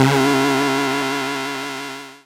Cartoon, Dizzy
This sound can for example be used in cartoons - you name it!